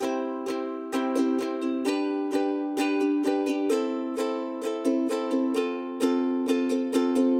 Ukulele Loop
Buy a Coffee for Me
Ukulele, Music